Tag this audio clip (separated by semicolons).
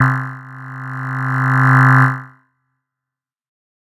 noise; pad; swell; tech